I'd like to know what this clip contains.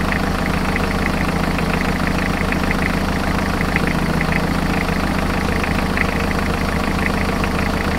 S010 Engine Idle Mono
Engine noise as it idles over